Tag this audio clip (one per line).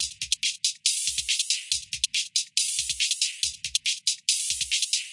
processed
beat
dance
loop
electronica